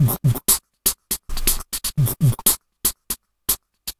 Beatbox 01 Loop 07 Complex@120bpm
Beatboxing recorded with a cheap webmic in Ableton Live and edited with Audacity.
The webmic was so noisy and was picking up he sounds from the laptop fan that I decided to use a noise gate.
A fairly complex beatbox rhythm. You will notice that there is a point where the noise gate opened ahead of the beat and some noise passes through. I think that actually adds character to the beat. Of course, you can remove that out with a sound editor, if you don't like it.